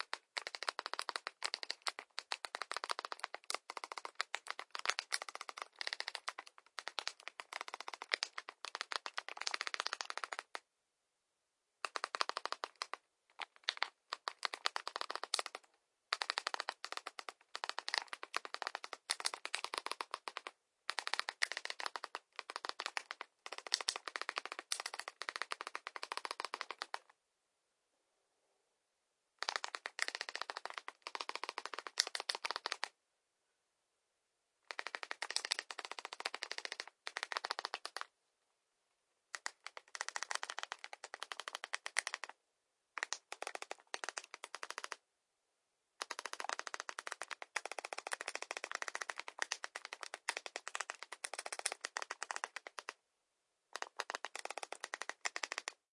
sound of my phone keyboard during writing an SMS. I wrote it in normal, not T9 mode. The speed maybe amazing but really, this is my normal speed of writing.
texting, writing, phone, mobile-phone, sms